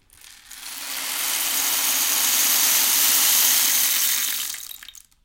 RAIN STICK B 005
This sample pack contains samples of two different rain sticks being played in the usual manner as well as a few short incidental samples. The rain stick is considered to have been invented in Peru or Chile as a talisman to encourage rainfall however its use as an instrument is now widespread on the African continent as well. These two rainsticks were recorded by taping a Josephson C42 microphone to each end of the instrument's body. At the same time a Josephson C617 omni was placed about a foot away to fill out the center image, the idea being to create a very wide and close stereo image which is still fully mono-compatible. All preamps were NPNG with no additional processing. All sources were recorded into Pro Tools via Frontier Design Group converters and final edits were performed in Cool Edit Pro. NB: In some of the quieter samples the gain has been raised and a faulty fluorescent light is audible in the background.